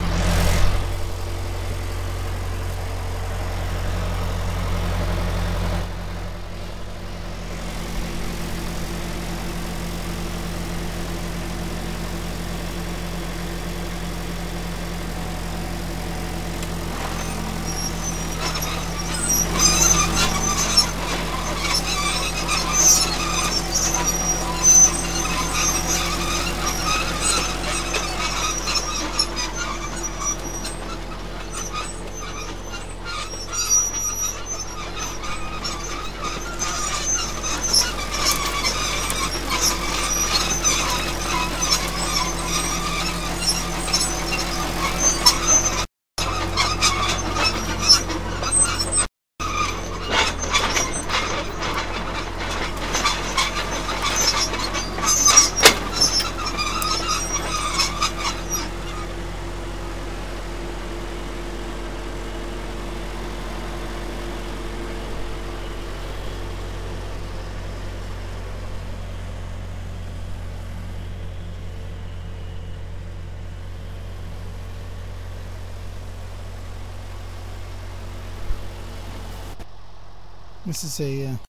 BULLDOZER SMALL CU
A Small but obnoxious Caterpillar bulldozer levelling a road. Mono sennhieser 416. Used for tank passing close up or use your imagination.